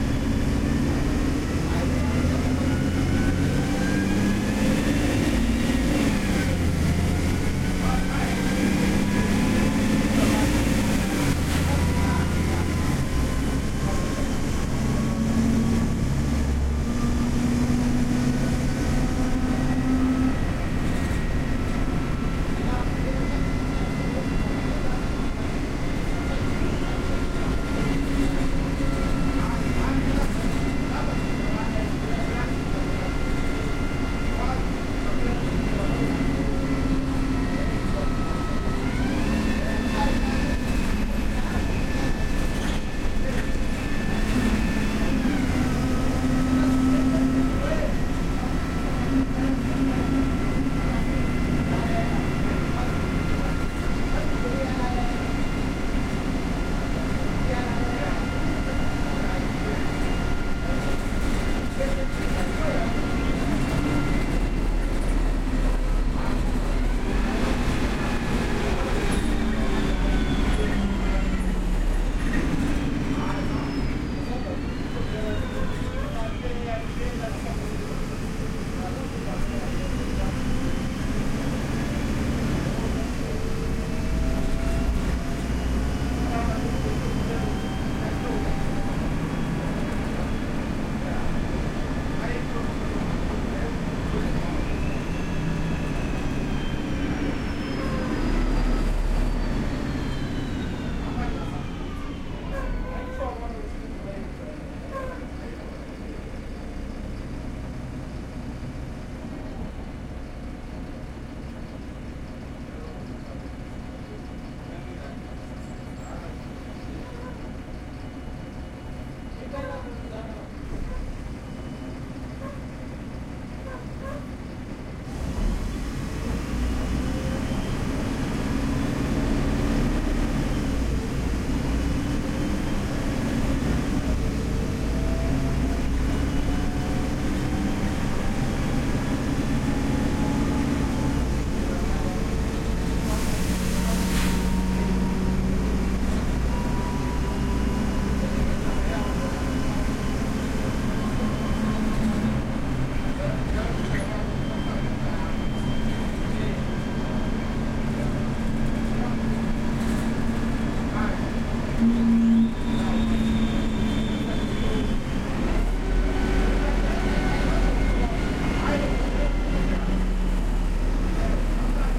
Sitting inside of a Gautrain Bus, South Africa
This was recorded on a bus with a moderate amount of people inside, periodically hopping on and off. Expect periods of slightly audible conversations.
ambiance, bus, drive, field-recording, night, OWI, people, road, stereo, vehicle, zoom-portable-audio-recorder